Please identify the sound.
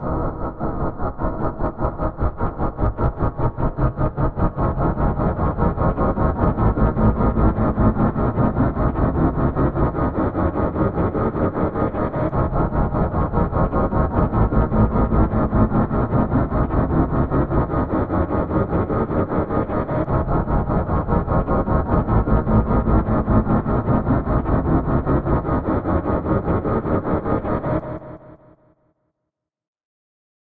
Waves of suspense
A loopable, rhythmic sound clip, meant to play in the background of a scene.